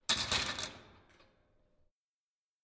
dropping the barbell Pokladani cinky 1
Dropping the barbell
bench-press, gym, barbell